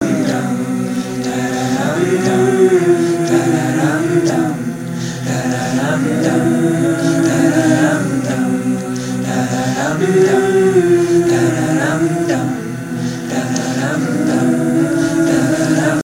DOGS Vocals
A collection of samples/loops intended for personal and commercial music production. All compositions where written and performed by Chris S. Bacon on Home Sick Recordings. Take things, shake things, make things.
loops, acoustic-guitar, drums, free, Indie-folk, Folk, looping, rock, voice, synth, loop, drum-beat, guitar, indie, whistle, vocal-loops, percussion, harmony, samples, original-music, bass, acapella, beat, piano, sounds, melody